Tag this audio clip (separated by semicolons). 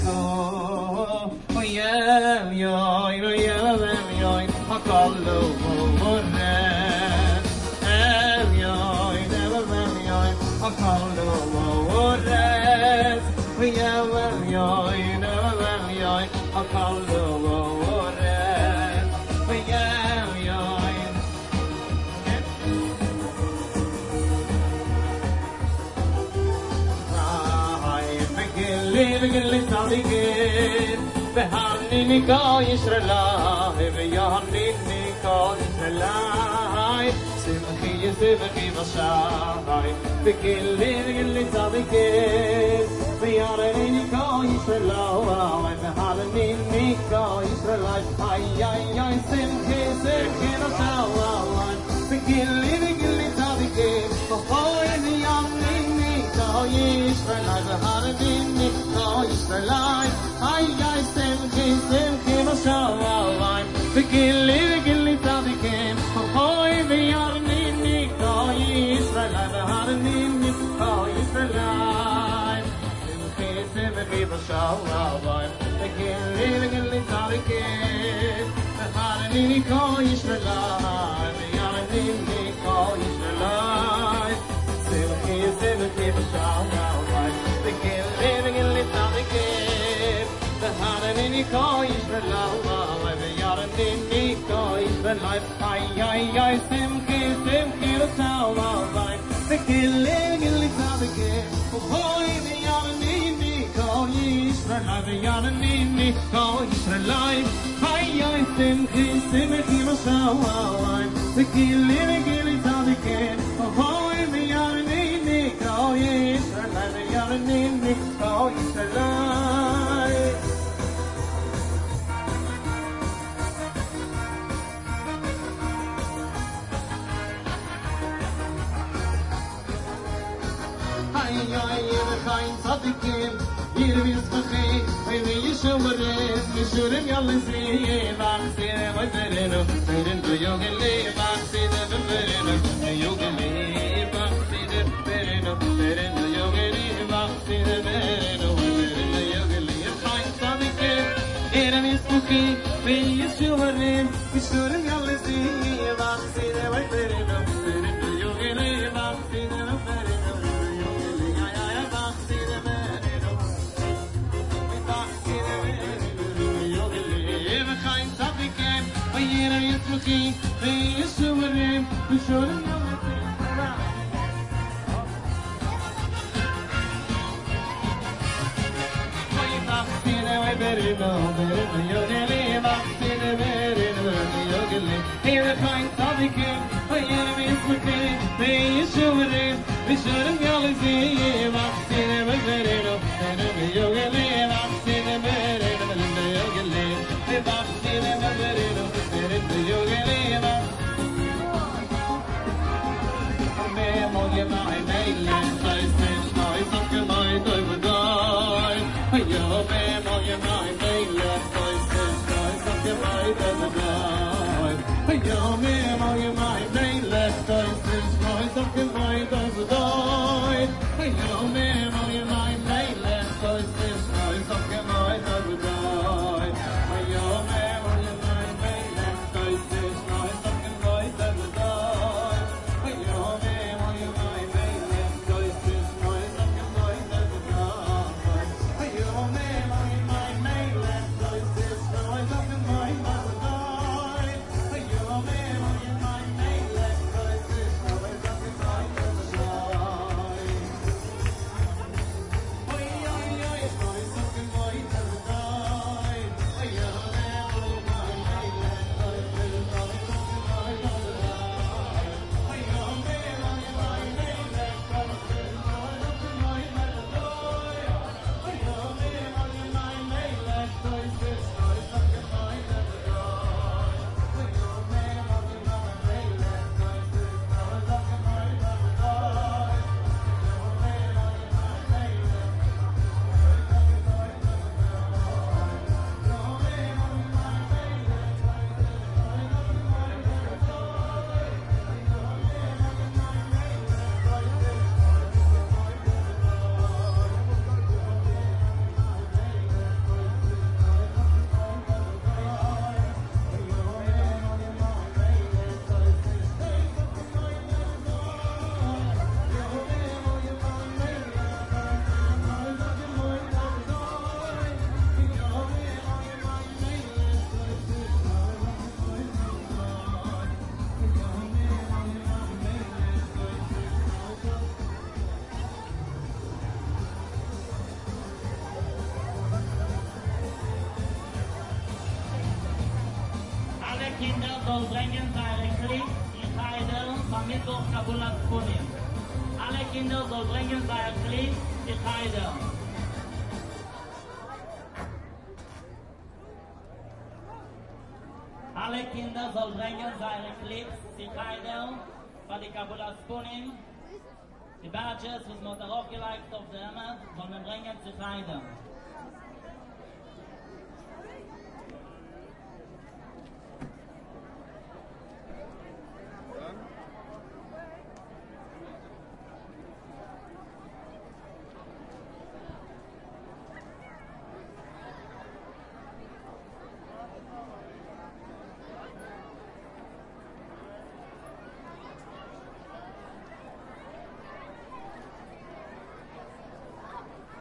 festivity; Jewish; music; street